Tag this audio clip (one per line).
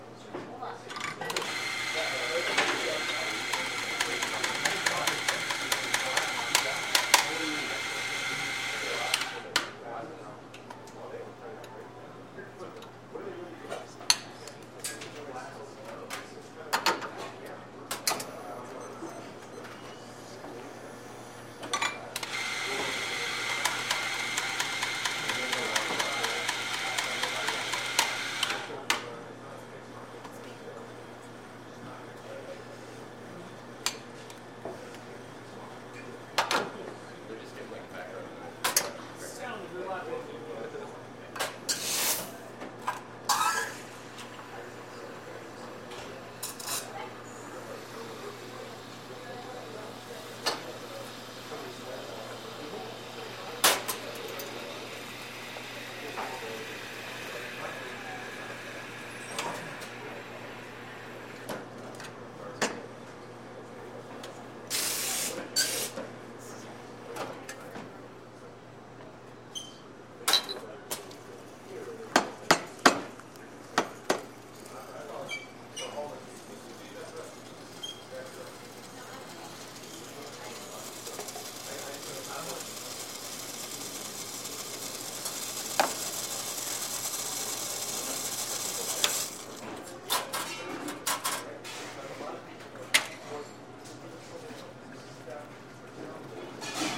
barista
cappucino
coffee
espresso
shop